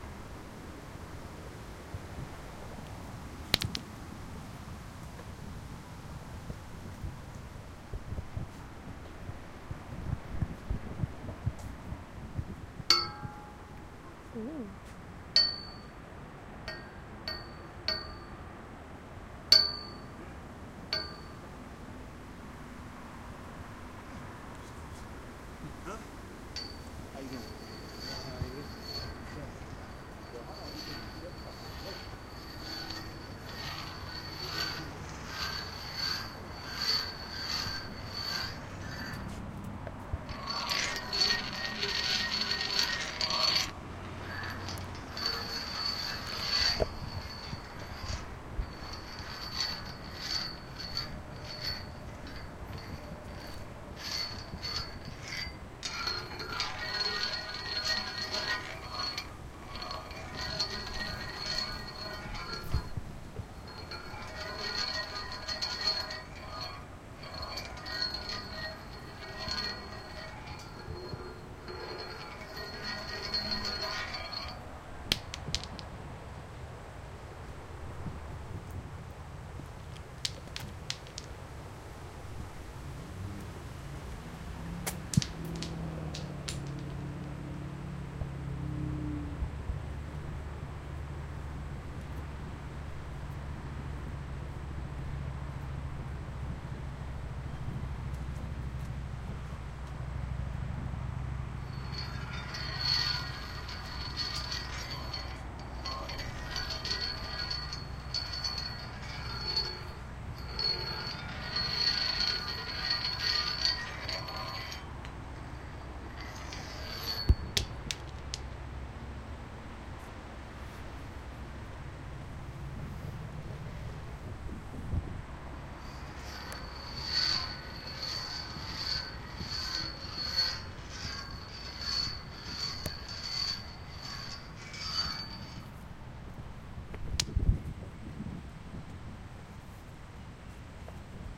rock on concrete stairs and metal railing, milwaukee, wi. outdoor